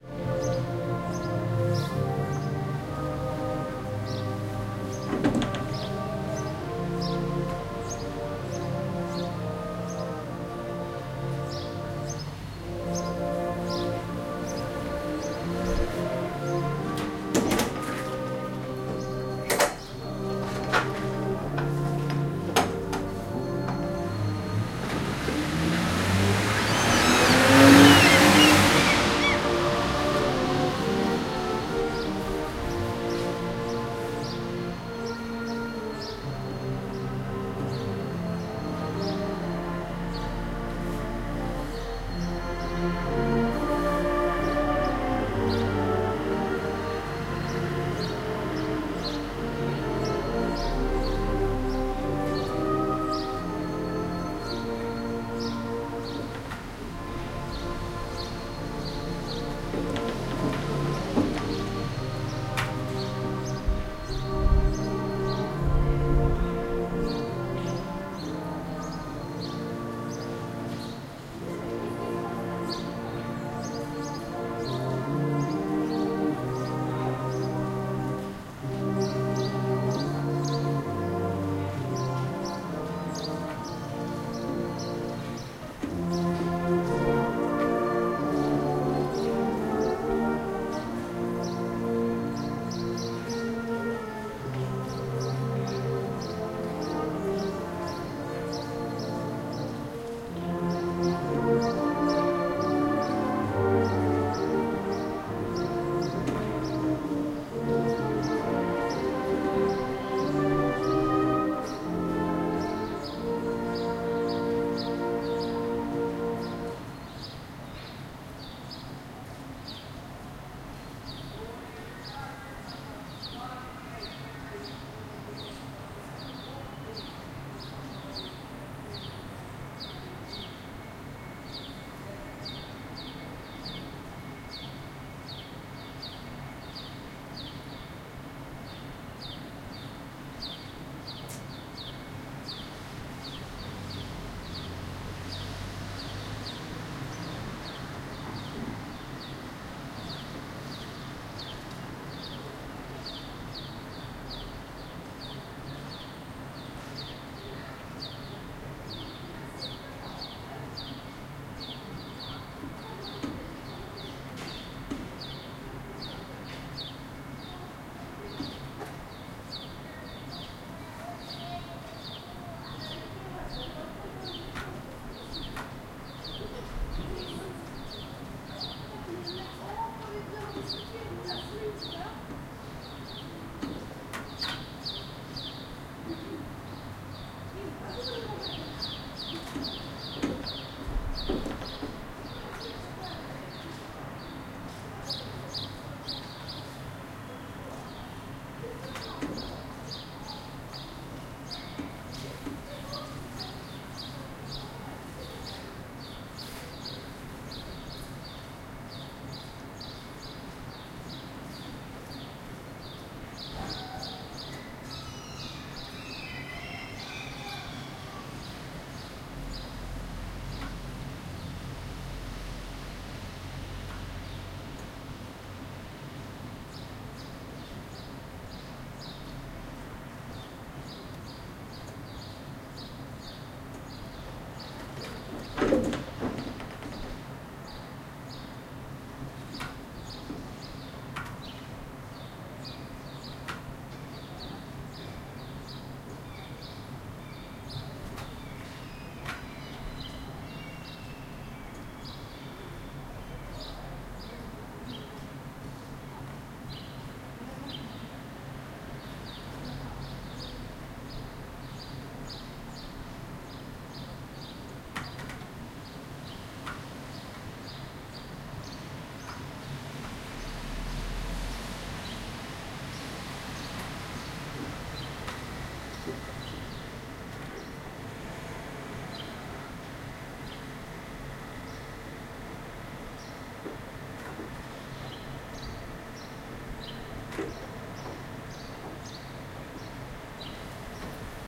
The Salvation army playing at kvilletorget, Gothenburg 14 / 05 - 15
Urban, City, Field-Recording, Park